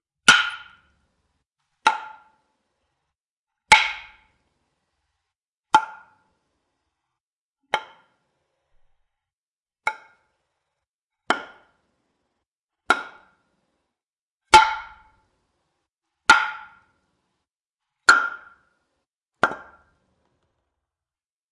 wood lumber stabs
Stabbing various pieces of wood.
Recorded with Oktava-102 microphone and Behringer UB1202 mixer.
craft, lumber, rustle, stab, wood